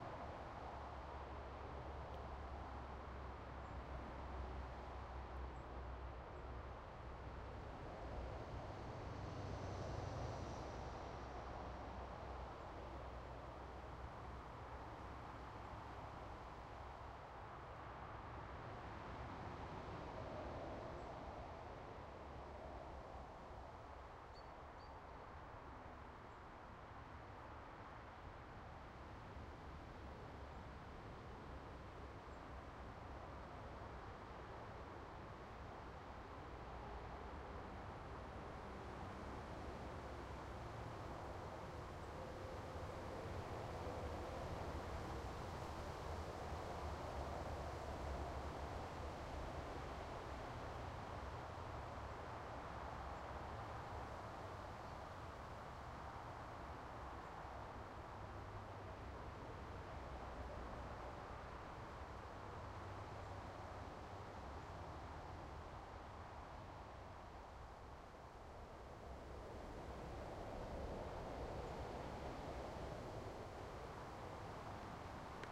HEAVY TRAFFIC M20 WITH BIRDIES WILLESBOROUGHwav
Recorded in a strip of woodland by the side of the M20 in Kent, lots of truck and car sounds, with a bit of birdsong for good measure. Recorded on a Zoom H2
traffic, birdsong, motorway